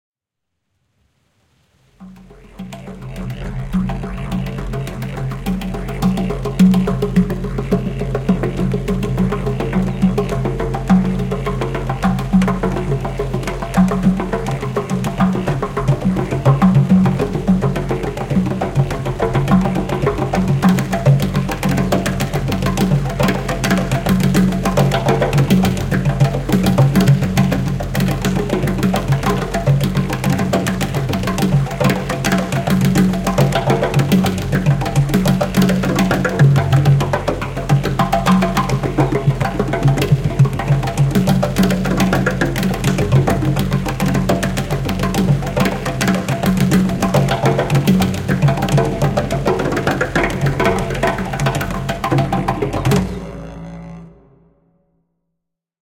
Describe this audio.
Creative Sounddesigns and Soundscapes made of my own Samples.
Sounds were manipulated and combined in very different ways.
Enjoy :)
Drumming, Percussive, Soundscape, Native, Tribal, Atmospheric, Tribe